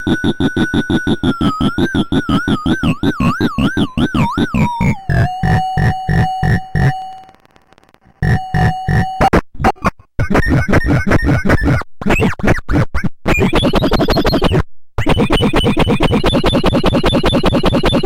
F Me FXC
circuit-bent, core, experimental, coleco, rythmic-distortion, just-plain-mental, bending, glitch, murderbreak